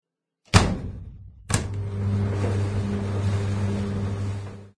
drying the washed clothes into a drying machine installed into the warehouse